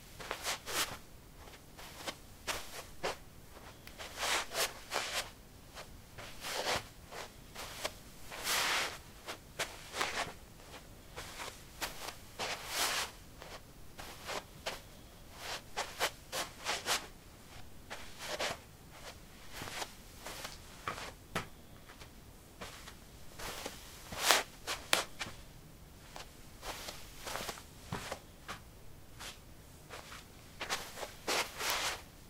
Shuffling on carpet: sneakers. Recorded with a ZOOM H2 in a basement of a house, normalized with Audacity.